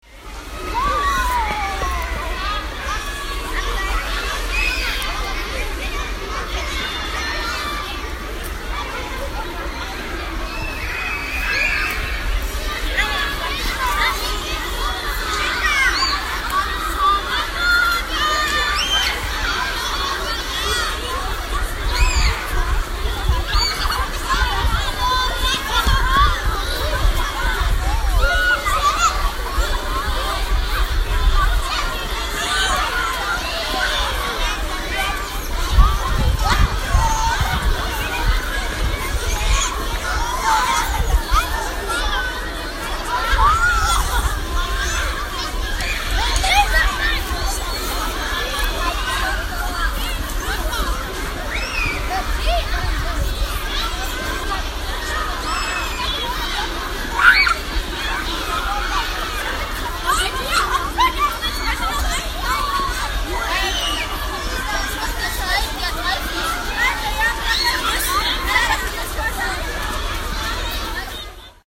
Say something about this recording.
School, big break
children, kidsbreak, playground, school